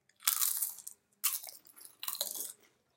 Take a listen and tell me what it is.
Crunching potato chips